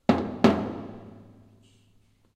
HAND DRUM LARGE DOUBLE HIT
Double hit.
Reverb added.
hand-drum, drum, large, percussion